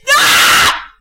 another scream
warning: LOUD
I think this one was from playing five nights at Freddy's, but instead of a shriek, it's a yell
girl, scream, yell